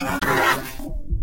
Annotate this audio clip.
Processed Balloon Sequence
Stroking a Balloon in various ways, processed.
alien, cat, noise